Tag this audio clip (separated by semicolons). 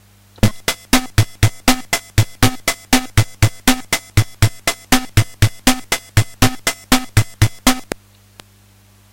80s; bossa-nova; portasound; pss170; retro; yamaha